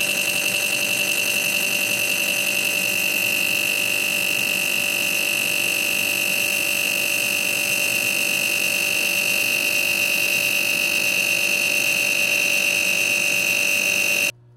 My refrigerator is broken :(
appliance, broken, buzz, hum, motor, rattle, refrigerator